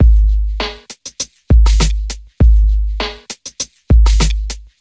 udarach 100bpm
100 bpm hiphop/breakbeat loop, subbassed basedrum and 2 snares, done by me around 2001.
100bpm, beat, breakbeat, hiphop, loop